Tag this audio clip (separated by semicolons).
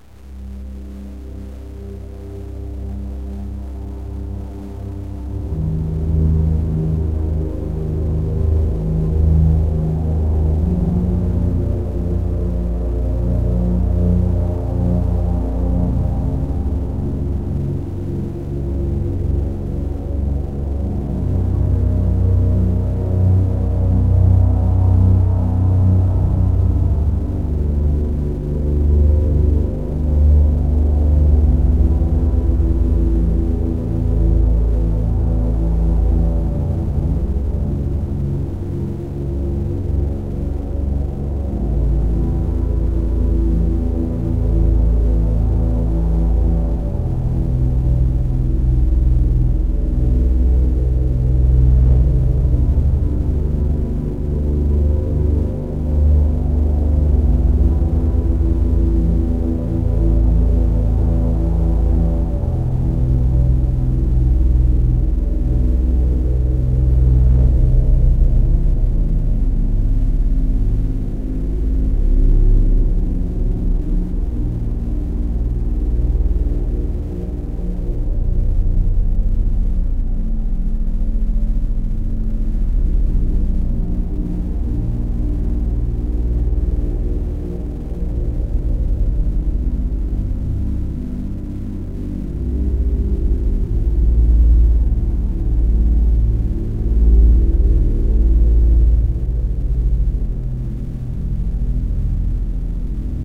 ambient; glitch; pad